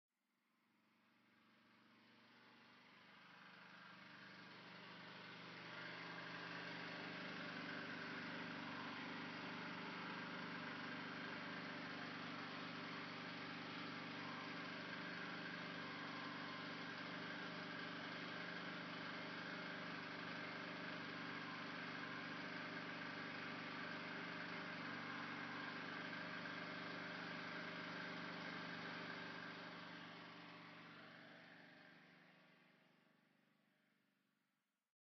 01-Genset sound -far- super yamaha 6.5hp - 3.5KVA
Recorded at Gemena Congo-DRC
Machinery, soundfar